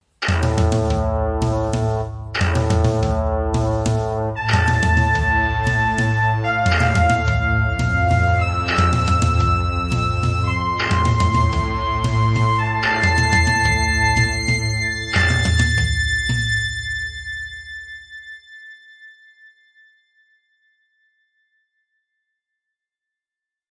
dramtic synth+trumpet
A dramatic sound trumpet and synth fragment that doesn't loop very well.Maybe could be used for a game or a movie?
Synth, Trumpet, Dramatic